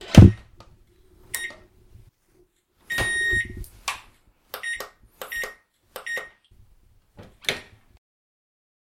KitchenEquipment DishwasherSetup Mono 16bit
Setting up the dishwasher
bit, 16